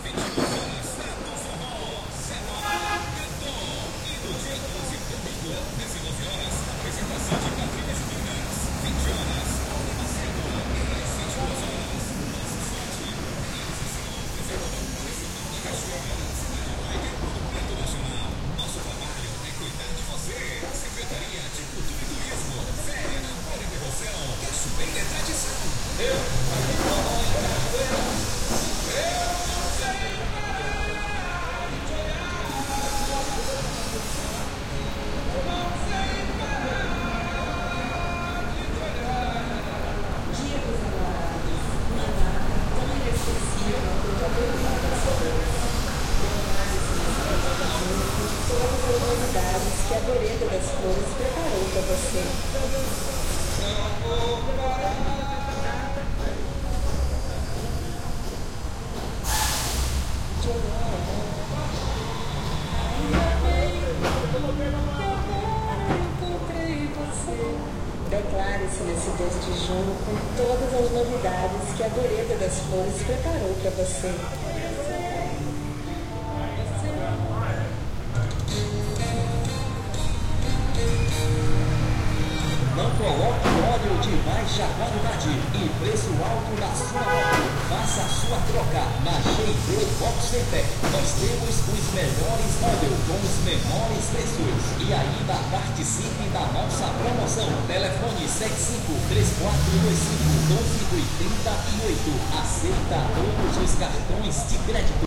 Praça do cinema / Square in Cachoeira
Longitude: - 38.96283835
Latitude: - 12.60519605
Elevação: 9 m
Local: Praça do Cinema
Bairro: Centro
Data: 10\06\16
Hora: 09:59 PM
Descrição: Som ambiente da praça
Gravador: Sony D50
Tags (palavras-chave): Cachoeira pra do Cinema Ambiente
Duração: 01:45
Autor: Gilmário e Wesley
brasil, cachoeira